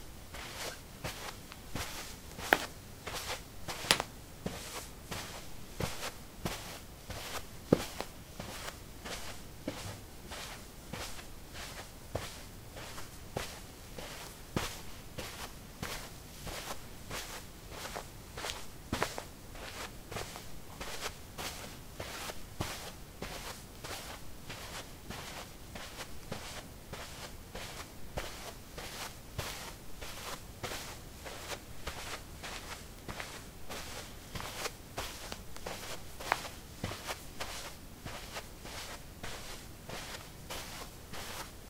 carpet 10a startassneakers walk
Walking on carpet: low sneakers. Recorded with a ZOOM H2 in a basement of a house, normalized with Audacity.
footsteps, footstep, steps